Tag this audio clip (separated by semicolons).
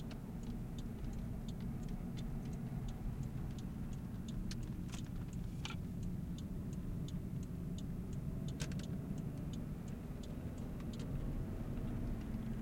drive; engine; car; car-indicator